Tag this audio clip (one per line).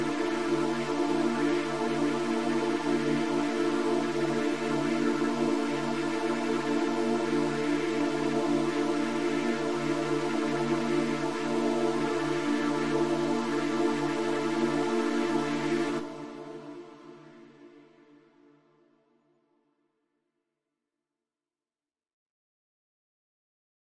Chill
Cinematic
Omnisphere
Out
Ambient
Pad
Chord
Soundscape